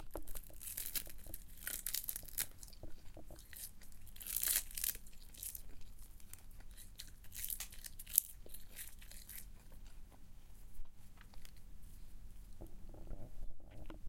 This is a sound of someone eating seaweed. Trying to produce onomatopoetic sound of food.
eating, food, foodsound, seaweed